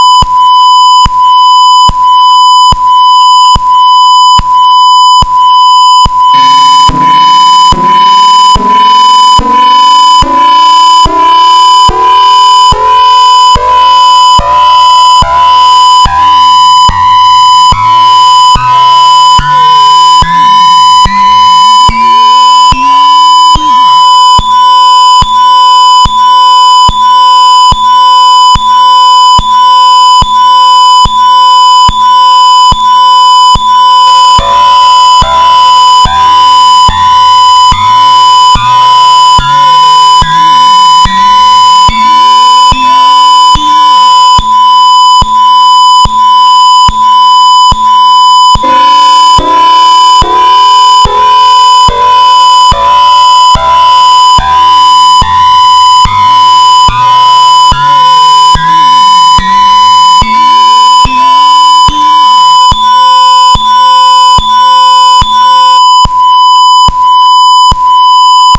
Of hundreds of navigation beacons for spaceships all have different signal characteristics.
Interstellar, navigation, radio, receiver, sender, stations, wavelength